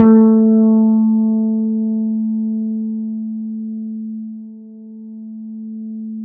A 1-shot sample taken of a finger-plucked Gretsch Electromatic 30.3" (77 cm) scale length bass guitar, recorded direct-to-disk.
Notes for samples in this pack:
The note performances are from various fret positions across the playing range of the instrument. Each position has 8 velocity layers per note.
Naming conventions for note samples is as follows:
BsGr([fret position]f,[string number]s[MIDI note number])~v[velocity number 1-8]
Fret positions with the designation [N#] indicate "negative fret", which are samples of the low E string detuned down in relation to their open standard-tuned (unfretted) note.
The note performance samples contain a crossfade-looped region at the end of each file. Just enable looping, set the sample player's sustain parameter to 0% and use the decay parameter to fade the sample out as needed. Loop regions begin at sample 200,000 and end at sample 299,999.
1-shot, bass, multisample, velocity